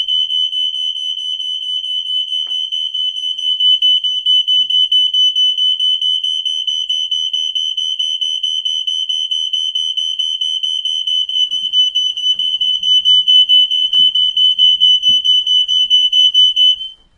Smoke Detector Alarm
A recording of a smoke detector/fire alarm i needed for a film, So i triggered my one at home and recorded it
Detector, Smoke, Alarm, sonic, fire-alarm, rayvizion